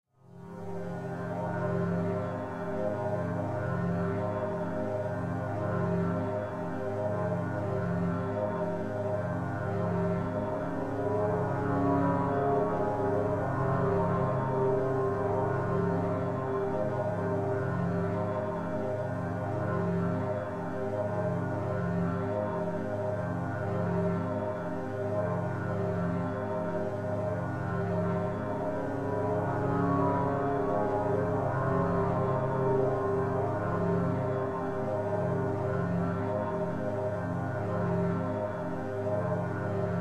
life line